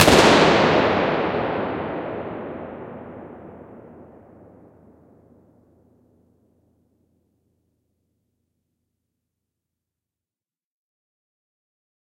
Gas pistol shot recorded in Humprecht castle in Czech.
It can be used with convolution reverbs.
castle, convolution, czech, hall, impulse, response, reverb